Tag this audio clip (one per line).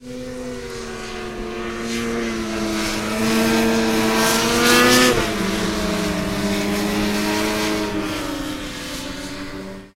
field-recording
motor-sports
recreation
snowmobile-trail
snowmobiles
winter